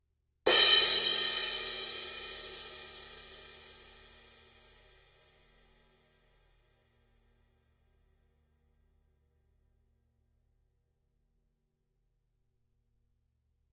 Paiste 2002 19" Crash Harder hit - 2009 Year Cymbal
Paiste 2002 19" Crash Harder hit